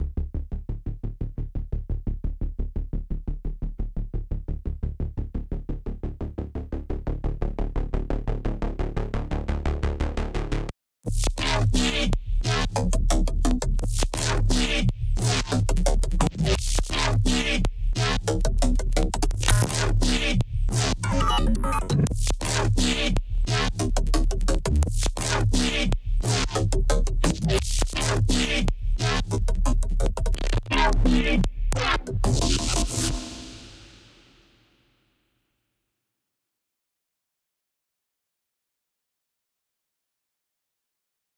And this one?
Web Crawler Bass
Design, drum, construction, song, Reese, bass, dnb, samples, kit